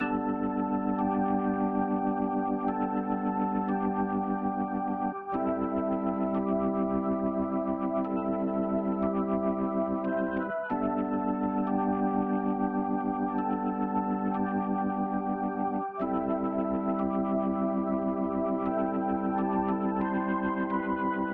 Lofi organ loop Gmaj 90 BPM
90, chill, loops, melody, music, pack, sample